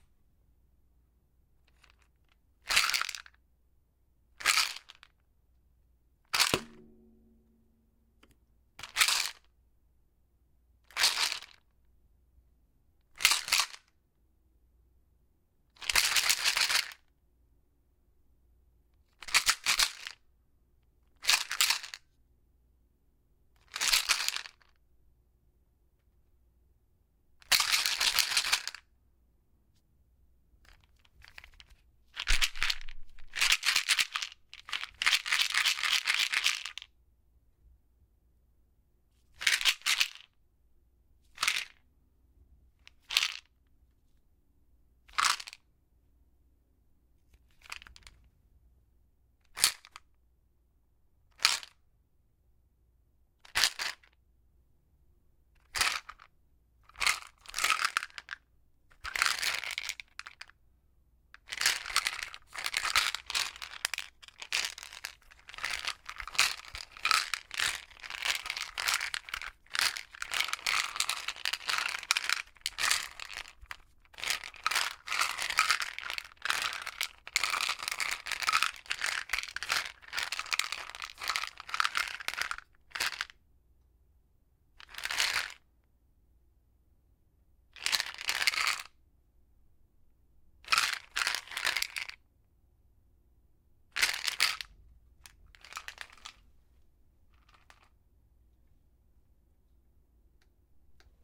I shook/rattled an over the counter bottle of pain relievers. The bottle is plastic with a plastic lid, and the bottle was fairly full.